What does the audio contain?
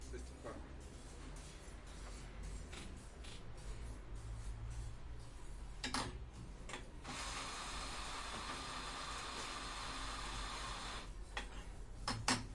sonidos barra de cafe
bar, coffe, shop, things